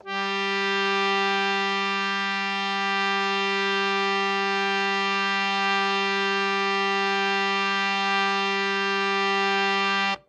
"Samples of all keys and drones separately from a harmonium. Recorded in the Euterpea Studio at Yale University's Department of Computer Science. Some equalization applied after recording."